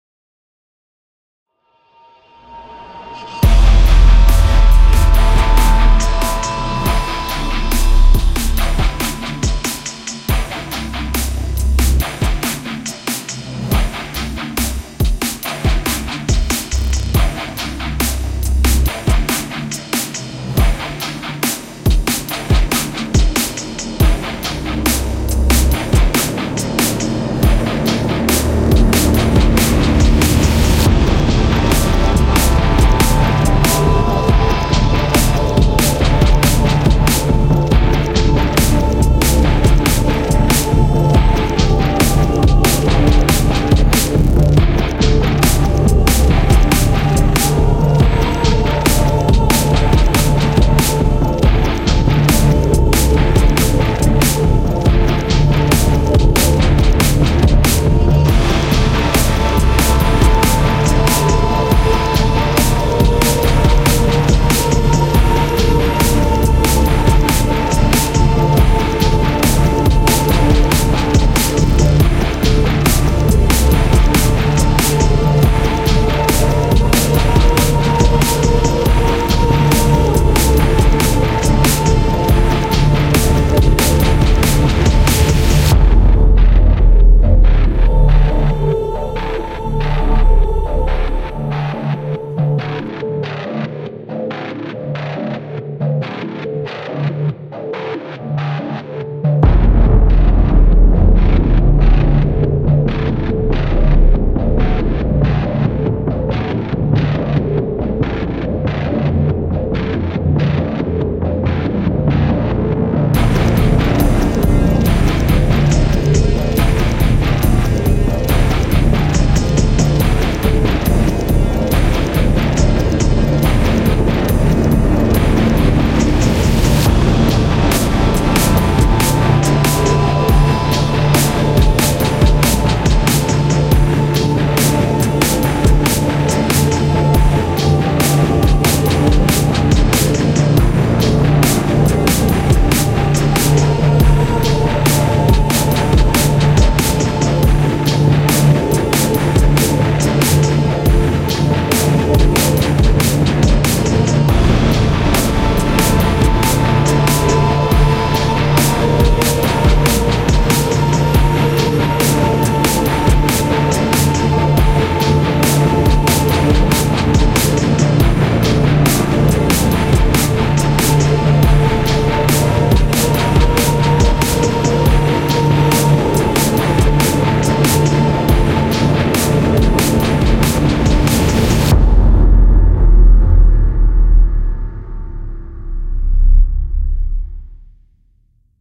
Epic, hybrid, dramatic, action soundtrack I made using samples in Fl studio. Very different track from me, I hope you like it.